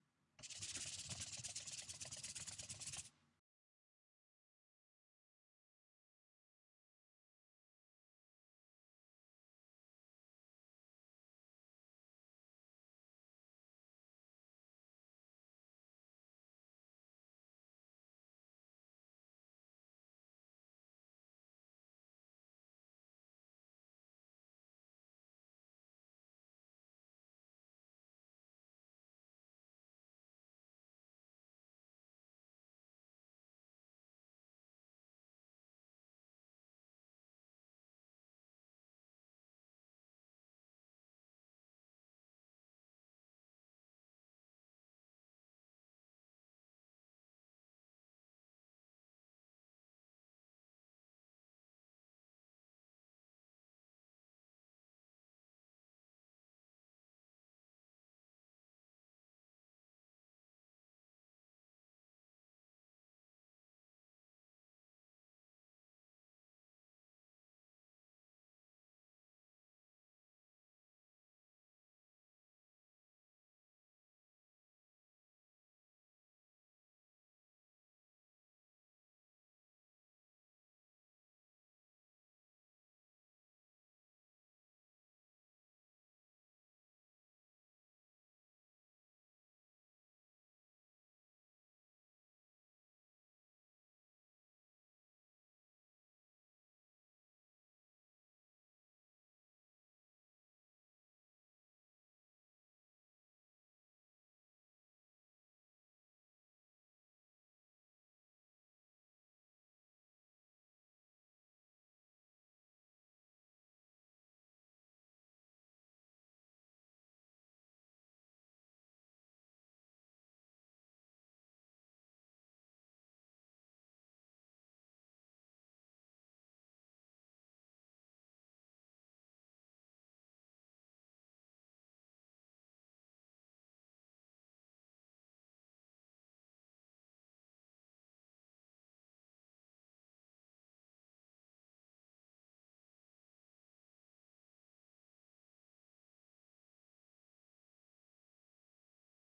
Soft scratching on desk manipulated to sound like a DJ Scratch. Recorded with a MacBook Pro.
DJ, MacBook, Scratching, Soft